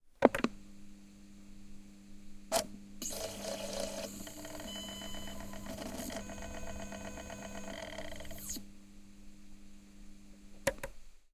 CD-Player, Turn On, Turn Off, 01-01
Audio of switching or powering on a "Roberts CR9986 Dual Alarm CD Player", letting it idle momentarily, before turning it off.
An example of how you might credit is by putting this in the description/credits:
The sound was recorded using a "Zoom H6 (XY) recorder" on 14th January 2019.
up, On, player, turning, down, cd, off, power